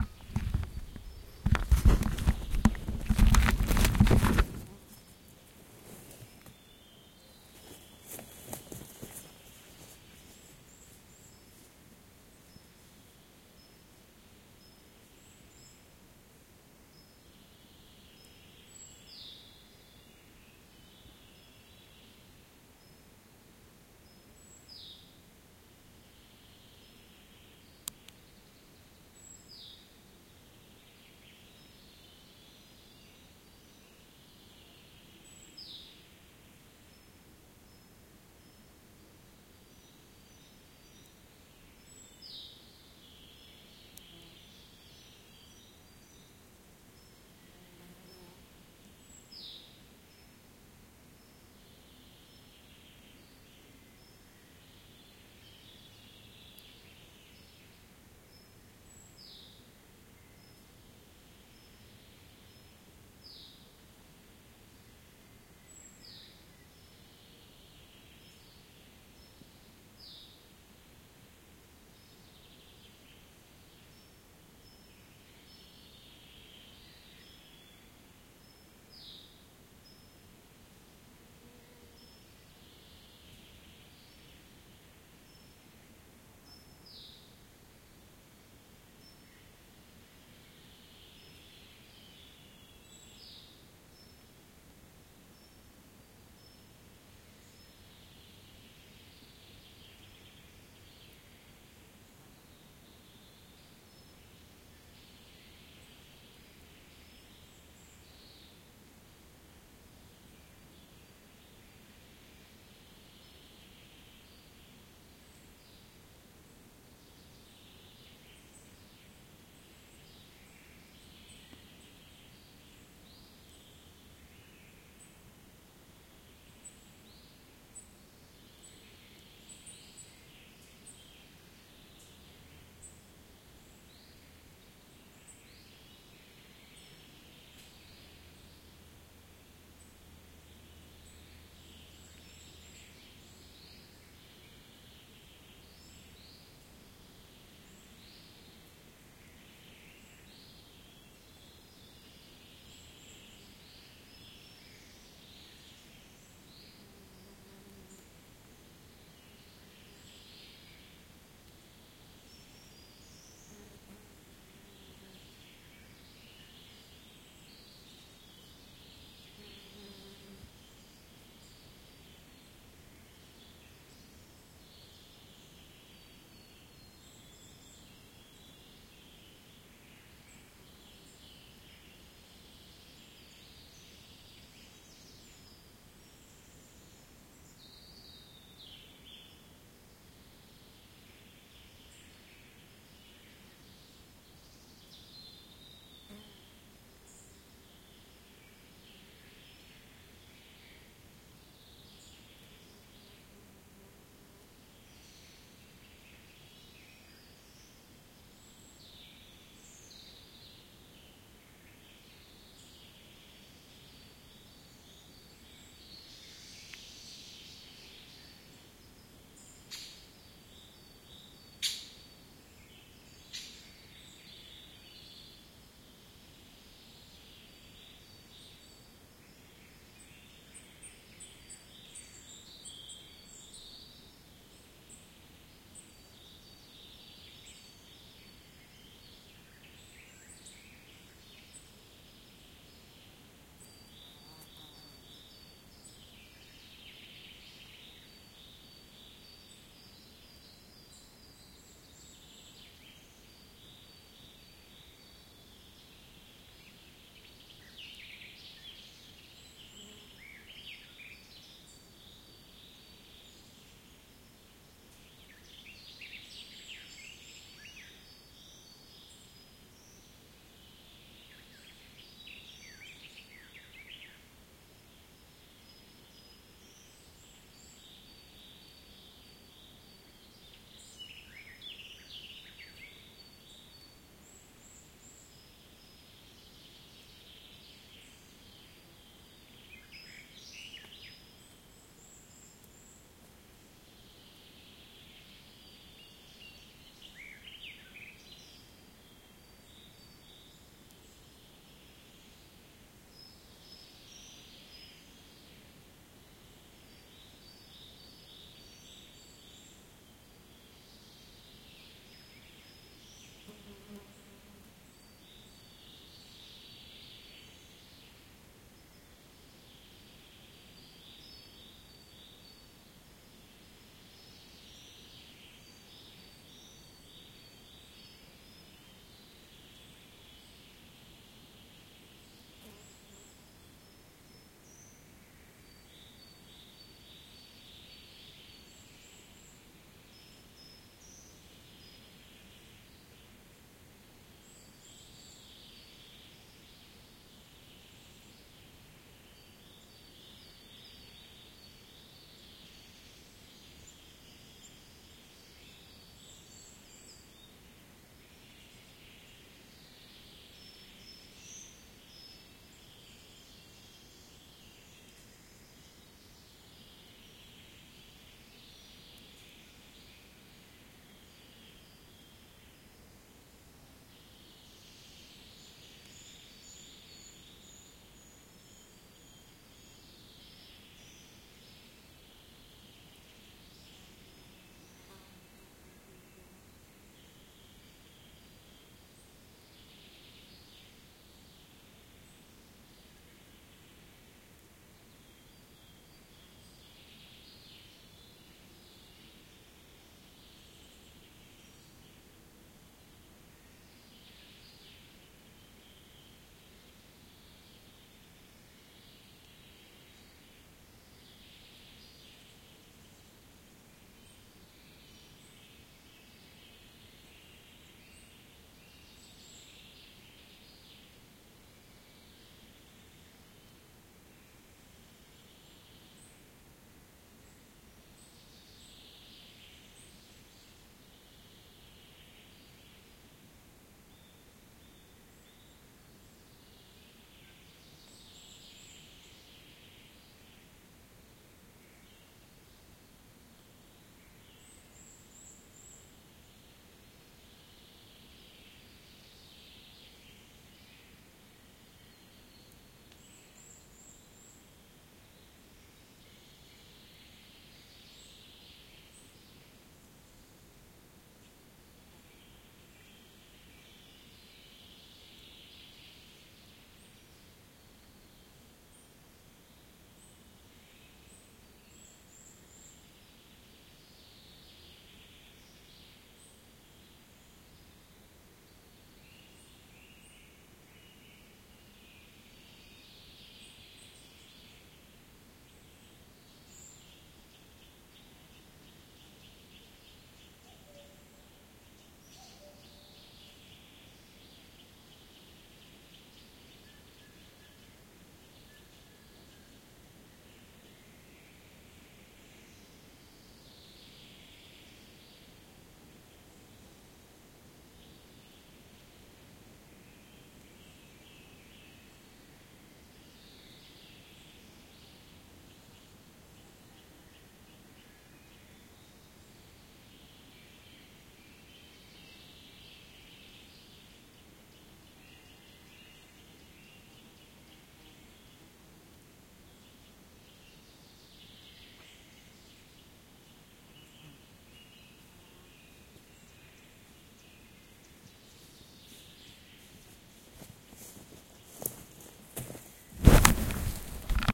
the sound of deep forest - rear
the sound of deep forest
ambience, ambient, birds, deep, field-recording, forest, nature, sound